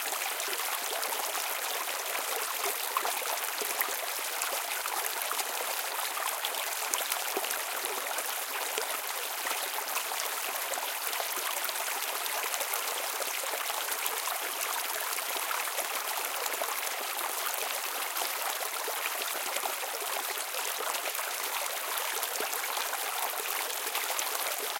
Ambiance River Flow Medium Loop Stereo
Sound of a medium flow in brook/river. Loop (0:24sc).
Gears: Tascam DR-05